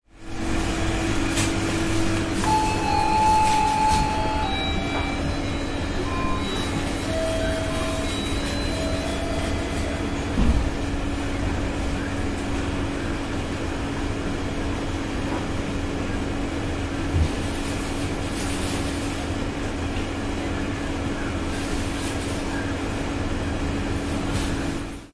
17092014 świebodzin roadside shop
Fieldrecording made during field pilot reseach (Moving modernization
project conducted in the Department of Ethnology and Cultural
Anthropology at Adam Mickiewicz University in Poznan by Agata Stanisz and Waldemar Kuligowski). Soundscape of a roadside shop in Świebodzin.
ambience, fieldrecording, melody, poland, road, roadside, shop, swiebodzin